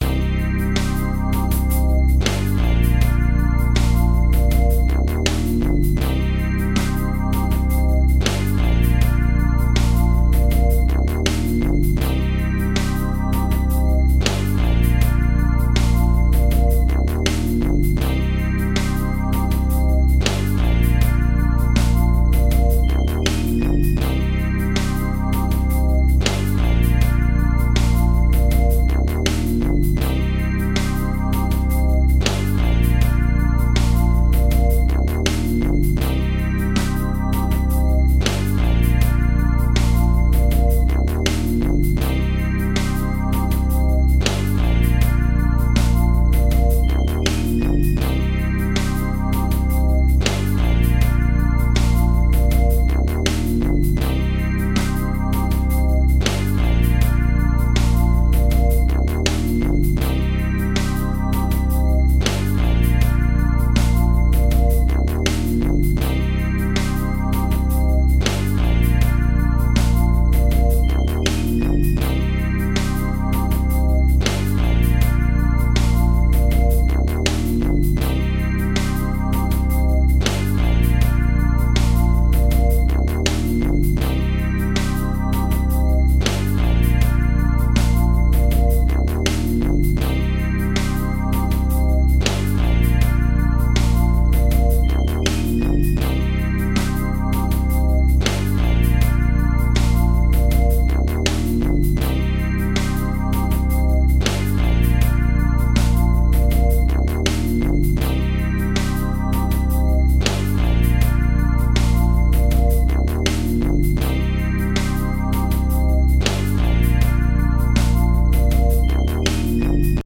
Psychic-Cm
A little 80s synth-pop loop I made, in the vein of seedy, neon, cyberpunk fiction.